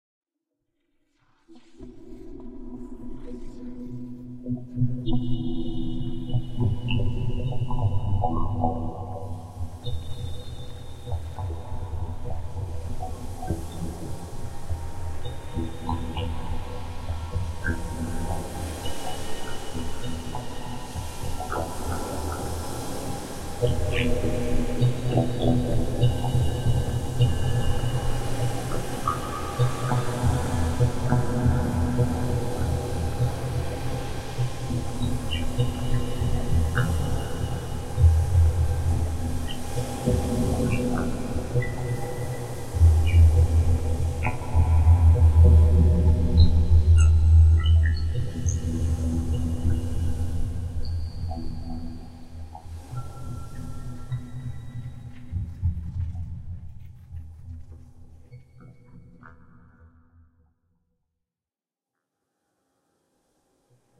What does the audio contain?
Water synthetic glacial cave
Standing inside of a cave made completely of ice, the cold drips glisten as they hit the glacial stalagmites.
Synthesized in Max/msp and mastered in Logic 7 pro
cave, competition, glacial, processed, synthesized, synthetic, water